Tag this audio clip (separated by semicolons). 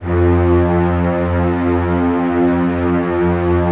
f1; multisample